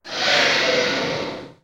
Sci Fi Door Hydrolic Swish
The sound of an airlock door
airlock, door, future, fx, hydraulic, sci-fi, space, spaceship